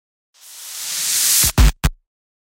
Sweeper imaging effect is a sweep rise effect with a distorted ending this can be used with radio station imaging.
radio, sweeper, effects, imaging